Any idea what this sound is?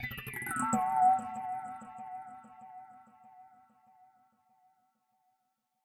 distorted music box 1
The sound of a tortured music-box. Made from a simple music-box recording with added distortion and effects. Part of the Distorted music-box pack.